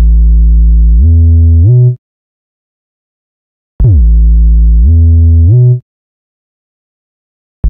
LS TM BASSLOOP 030 125 Am
house, techno, minimal